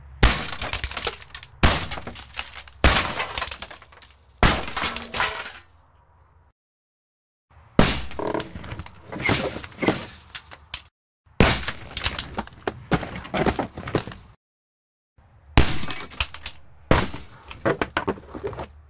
window break glass shatter ext perspective trailer
window, trailer, perspective, glass, ext, break, shatter